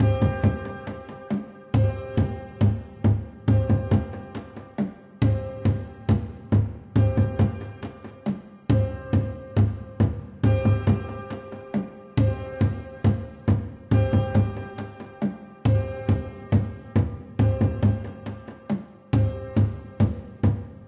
African Drums Loop With A Reverb Piano Playing. It will loop perfectly!
Type: Wave
BPM: 69
Time: 00:00:20